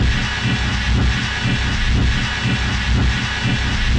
A brutal, insanely indu loop. A poor example of rhythm, but it makes its point...
industrial, intense, indu, loop, aggressive, angry, noisy, drums, demanding